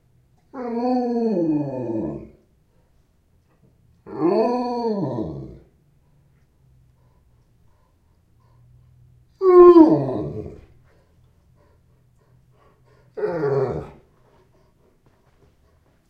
A recording of my Alaskan Malamute, Igor, while he is waiting for dinner. Malamutes are known for their evocative vocal ability. Recorded with a Zoom H2 in my kitchen.
bark, dog, growl, howl, husky, malamute, moan, sled-dog, wolf